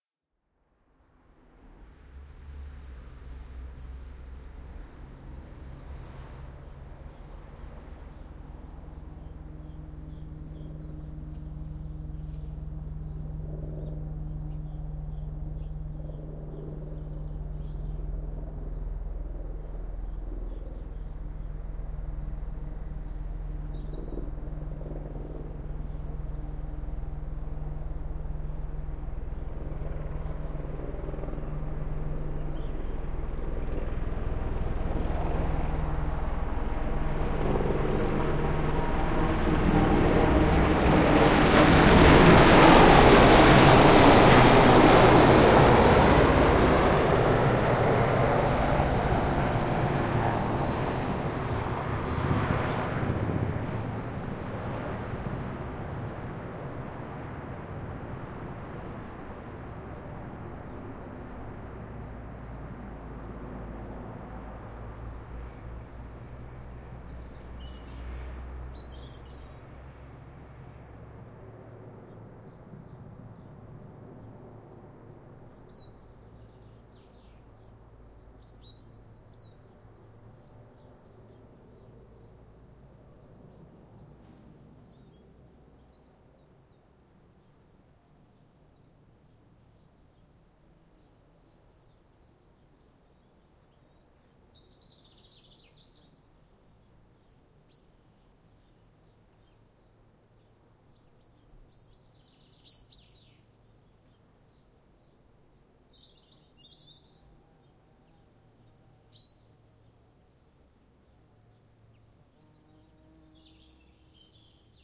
A stereo field-recording of an RAF Westland WS-61 Sea King search and rescue helicopter flying low and diagonally overhead.Rode NT-4 > Sony PCM-M10